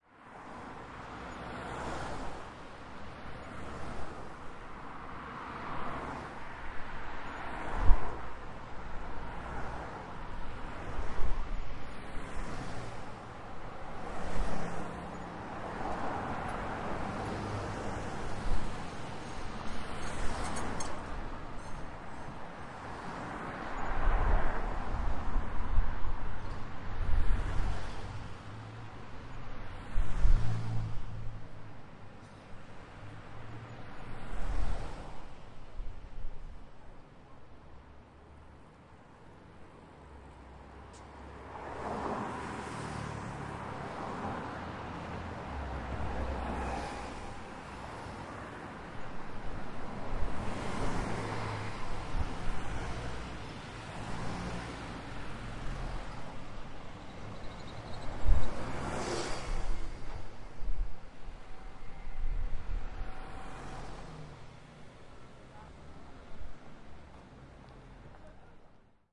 Street Middle
Recorded standing in the middle of busy two-way street in London. Cars, buses and occasional bikes passing
cars-passing, traffic